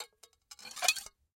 Small glass plates being scraped against each other. Smoother scraping sound, fairly quick. Close miked with Rode NT-5s in X-Y configuration. Trimmed, DC removed, and normalized to -6 dB.